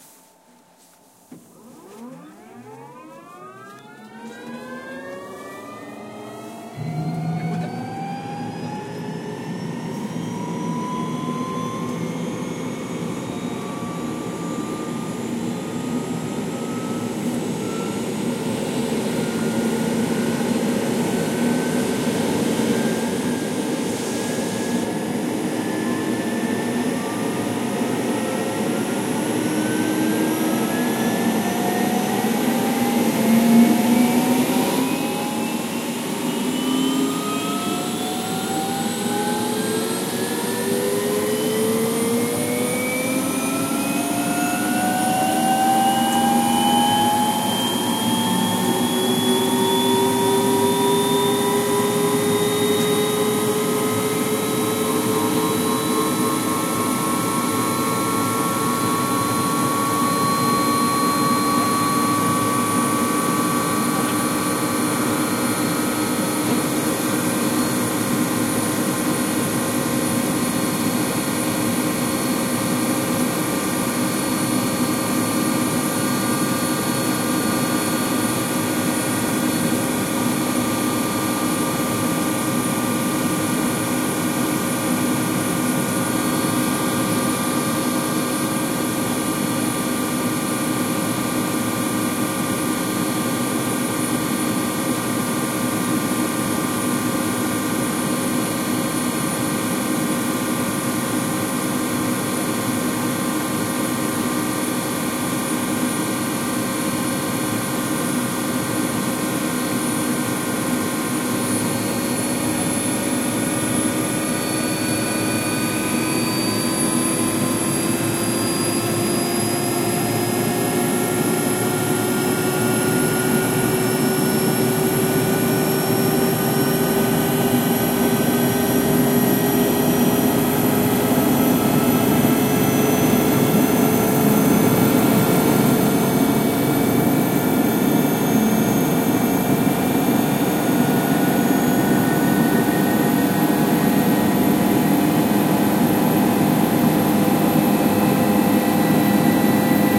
20130402 copter.inside.accelerating.08
Helicopter rotor accelerating, recorded from the inside... somewhere over Tronador Volcano (near Peulla, Vicente Perez Rosales National Park, Chile)
chopper, engine, field-recording, helicopter, rotor